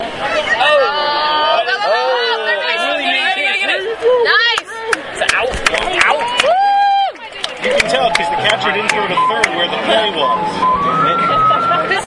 "It's root root root for the home team..." field recording at Cyclones game.
chant, hometeam, root